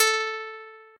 Plucked
Guitar
Single-Note